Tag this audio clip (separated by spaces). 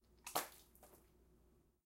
blood floor liquid spill splash splat splatter water wet